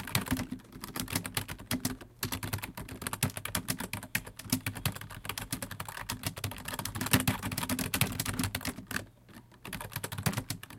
Very quick typing
I had a friend type a few words out ( Seeing as I am immensely slower than he is). It was rather funny to watch.
Recorded with: ZOOM H2n, XY mode.
laptop, stroke, windows, quick, typing, fingers, keyboard, keys, computer